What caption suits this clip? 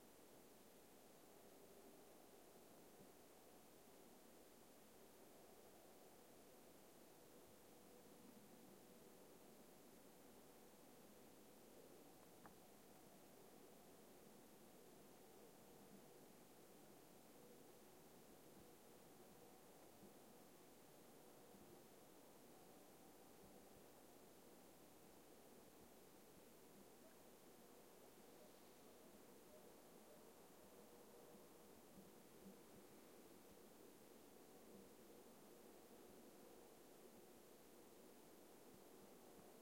deep silent in the forest
I recorded an atmosphere of a silence in the forest.
atmosphere, deep, forest, silent